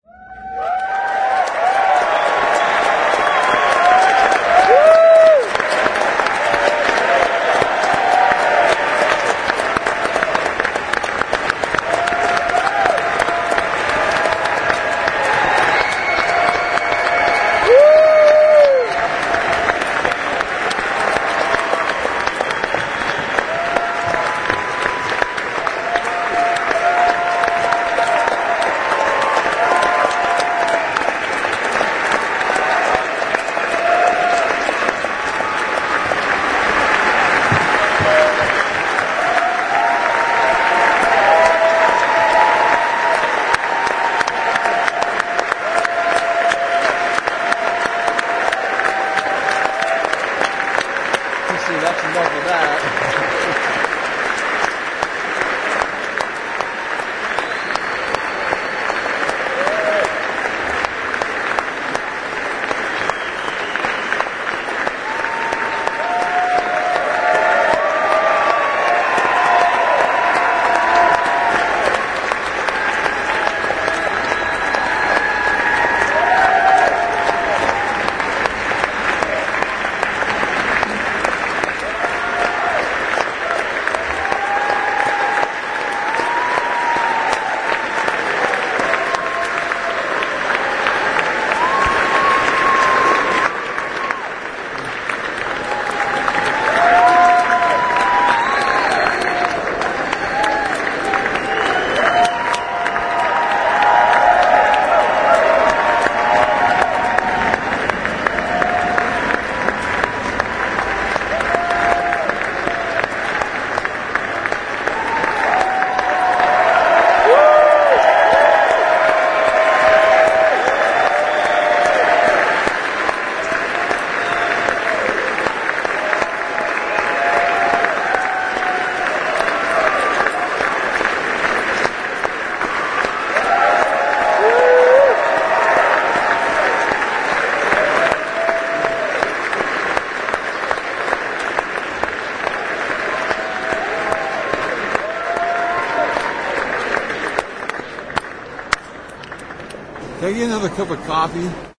BEN HUR APPLAUSE-CURTAIN CALLS
I recorded this with my Yamaha Pocketrak at the Paramount Theater in Seattle on Feb. 29th, 2016 with one track duplication. This is the applause for Stewart Copeland and The Seattle Rock Orchestra. Copeland scored the music for the silent version of 'BEN HUR' and the show was great as you can hear from the final applause. You can hear my friend Brian at the end asking for some coffee. Thanks.
Applause, Calls, Curtain, Movie